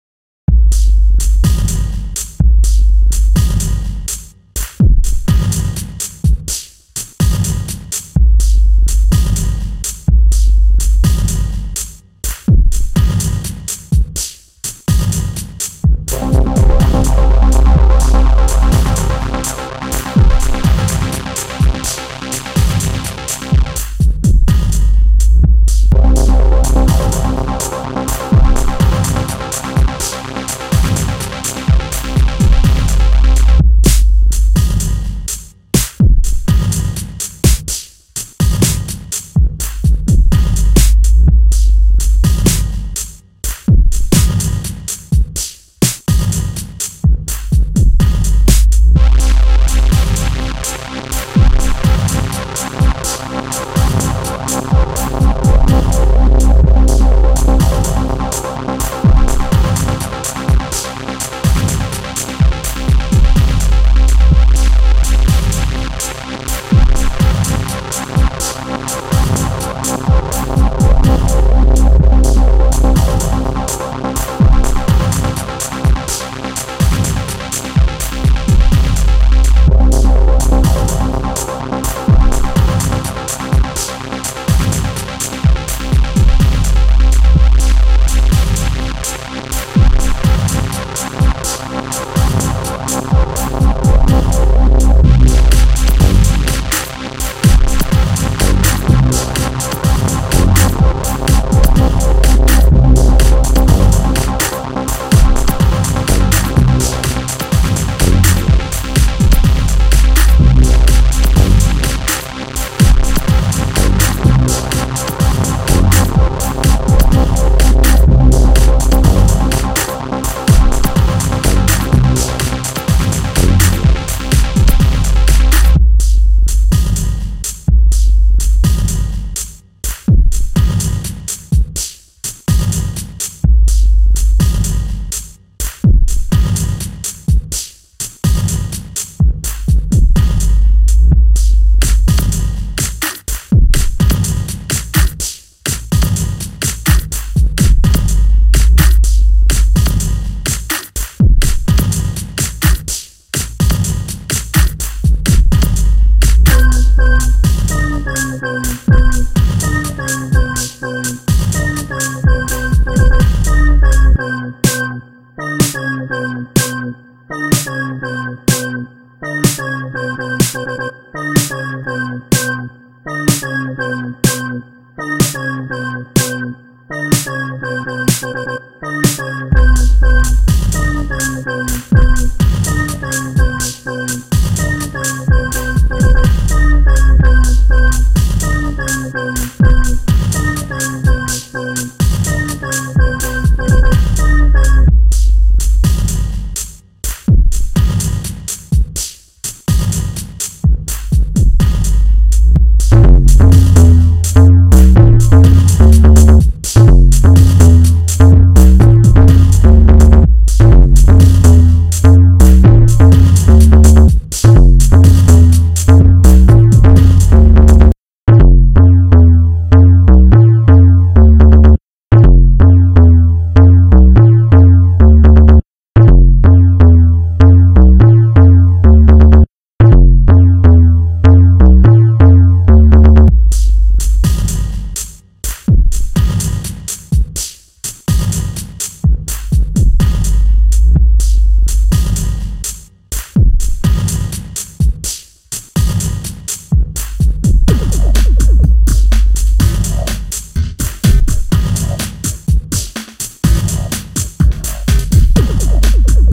Austral - Death (1)
industrial, waveform